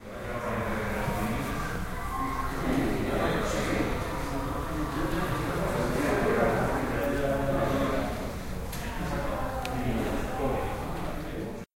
environment 1st floor
Environment noise of the first floor of the library. People talking and reverberation. Recorded with a tape recorder in the library / CRAI Pompeu Fabra University.
1st, campus-upf, environment, floor, library, UPF-CS14